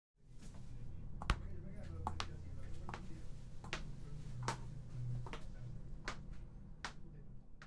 20-pasos alejandose
A footsteps moving away
around, walking, footsteps